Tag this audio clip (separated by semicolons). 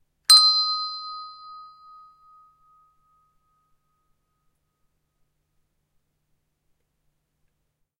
bell; bells; bright; d; D-sharp; eb; E-flat; flat; hand; instrument; percussion; sharp; single